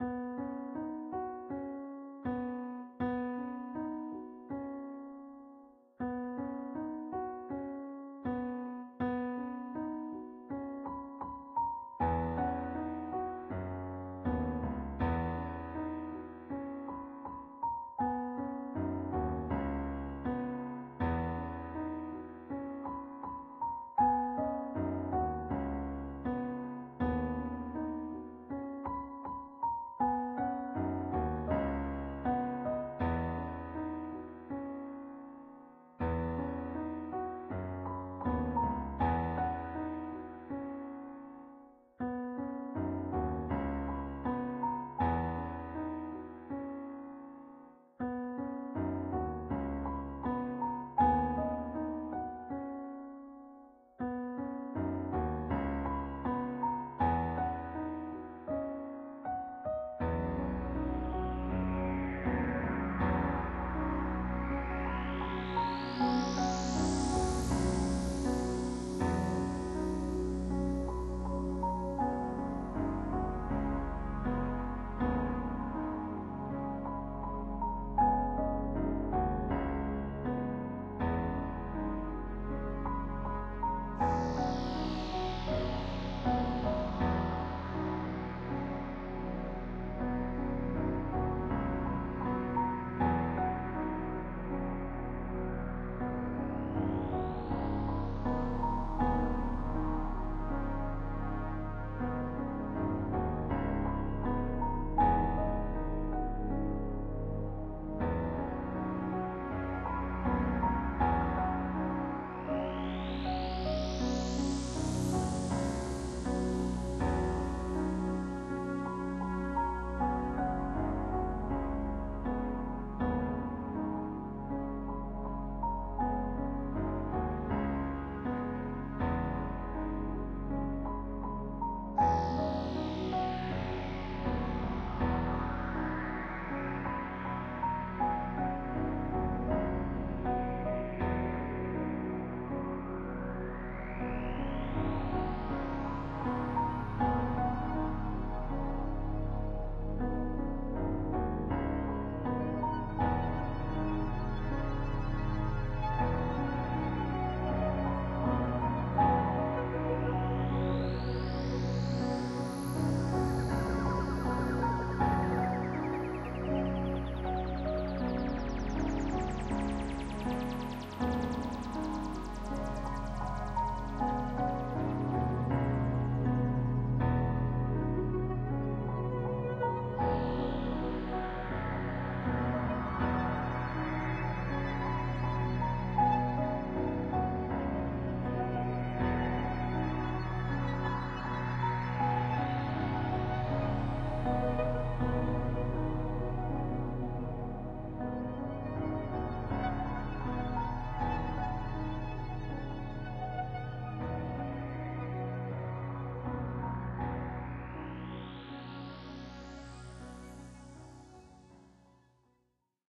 Haymaking on Jupiter
lyrics, melancholy, weird, romantic, astro, piano, sadness, music, stars, soundesign, effect, future, ambient, synth, cosmos, lyric, dream, space, fight, science, laser, retro, sci-fi, computing